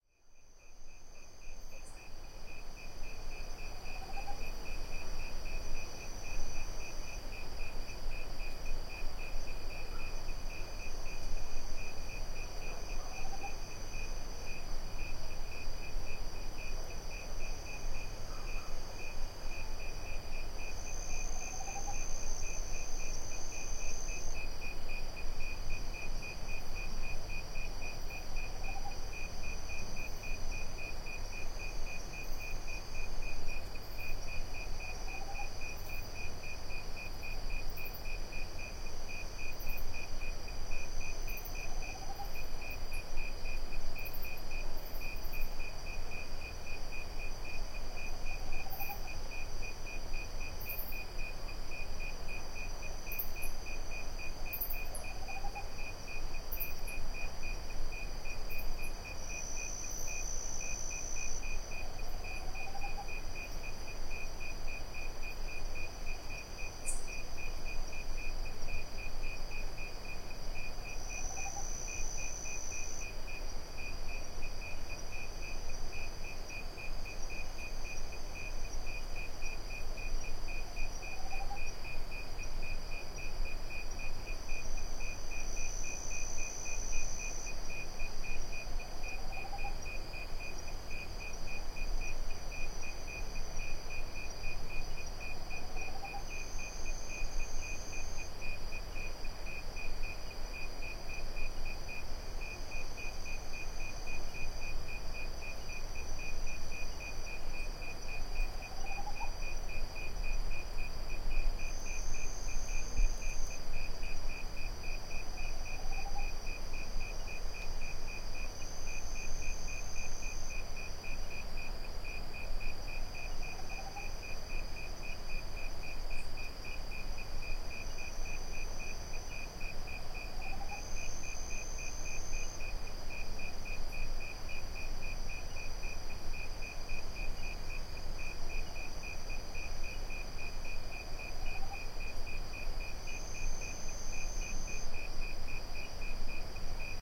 Owl at night.
Interior of Minas Gerais, Brazil.